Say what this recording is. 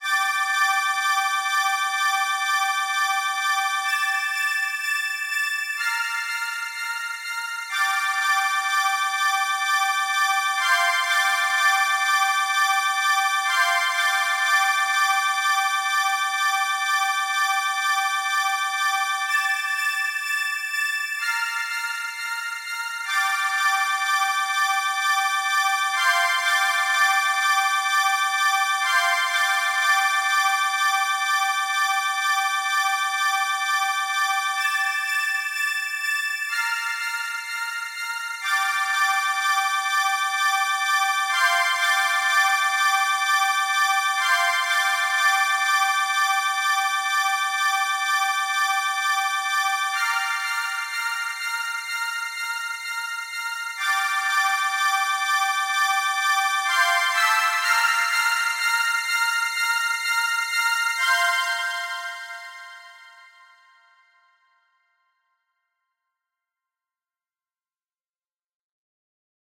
LEARNING - TWO BACKS MIX - HIGH STRING
This is a part of the song who i consider is the most important in this mix version. There have 5 parts of the strings and pad, and the conformation if you listen attentionally.
learning, strings, mix, two, pads, backs, pad